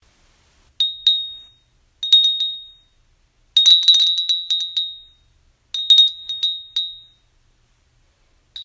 Glass Bell Ringing
I managed to get ahold of my grandmother's bell collection. Most of these are very small and high pitched, but this one is bigger and makes a very full, glassy ding.
Bell, Ding, Roll